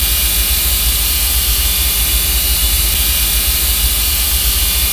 Created using spectral freezing max patch. Some may have pops and clicks or audible looping but shouldn't be hard to fix.
Sound-Effect, Soundscape, Background, Perpetual, Still, Everlasting, Freeze, Atmospheric